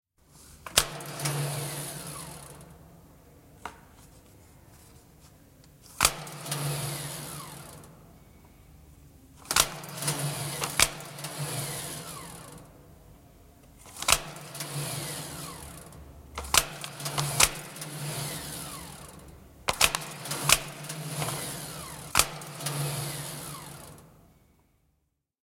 Kellokorttilaite, leimauksia / Old time clock from the 1960s, several clock in, punch in sounds, echoing
Vanha kellokorttien leimauslaite, 1960-luku. Leimauksia hieman kaikuvassa tilassa.
Paikka/Place: Suomi / Finland / Helsinki
Aika/Date: 1969
Clock, Clock-in, Field-Recording, Finland, Finnish-Broadcasting-Company, Kellokortti, Kellokorttilaite, Leimata, Leimaus, Punch-in, Soundfx, Suomi, Tehosteet, Time-clock, Voimajohdot, Yle, Yleisradio